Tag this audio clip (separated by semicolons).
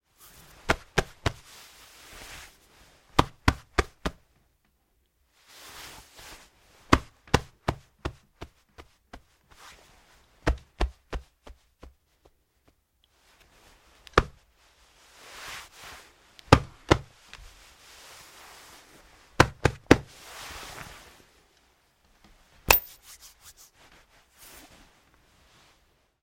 jacket; jakni; pat; po; tapkanje